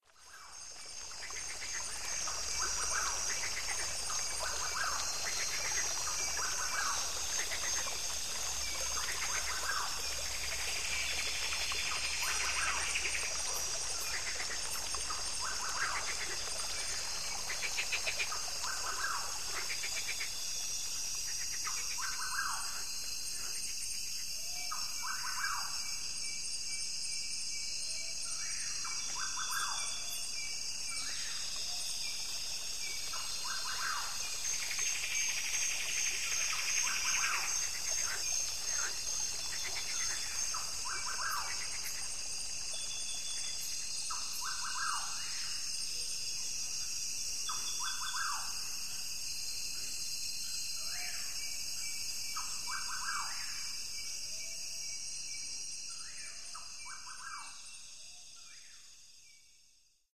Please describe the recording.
I figured I'd pop it up here for all to enjoy.